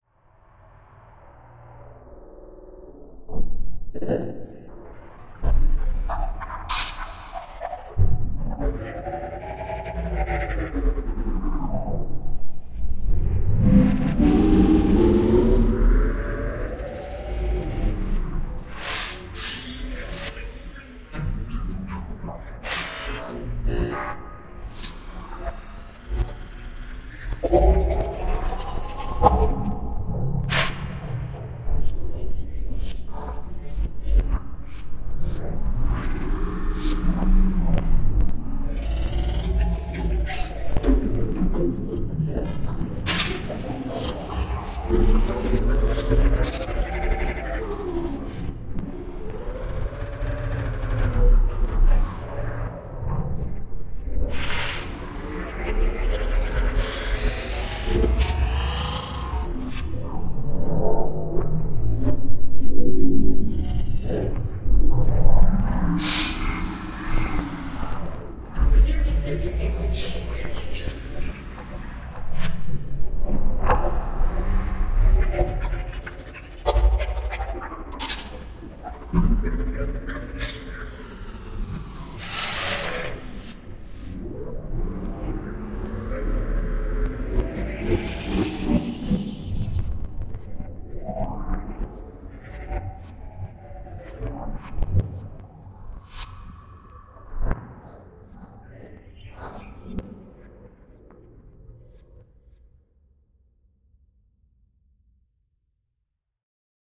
grainy season cut
Asian percussion texture send through a grain twister constructed with Reaktor 5 - the result send through a multi tap delay which was recorded as an Audio file and reversed and then added to the original grains.